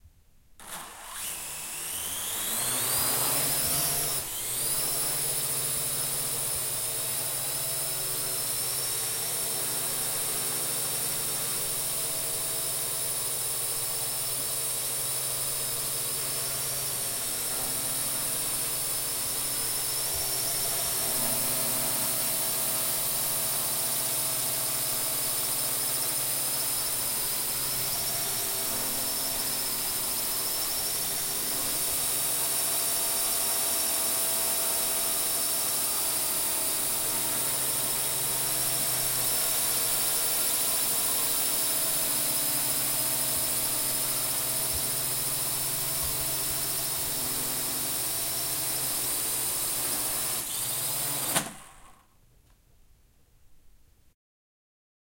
FXLM drone quadrocopter launch far T01 xy
Quadrocopter recorded in a TV studio. Zoom H6 XY mics.
drone, engine, far, flying, h6, helicopter, launch, propeller, quadrocopter, startup, warmup, xy